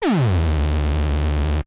Short sound effects made with Minikorg 700s + Kenton MIDI to CV converter.
FX, Korg, Minikorg-700s